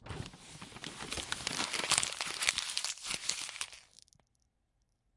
Crunching paper 1
A paper in a palm, made smaller really quickly.
Recorded with ZoomH2n, XY mode.
paper, fold, page, folding, trees, crunch